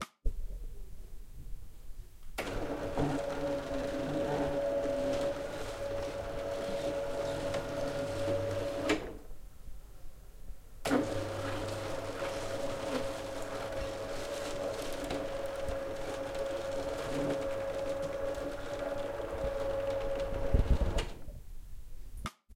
electric blinds moving up with switch